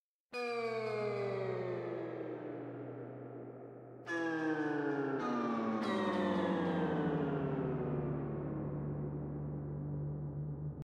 falling, portamento fX sounds created with the Roland VG-8 guitar system
falling, meteors, zapping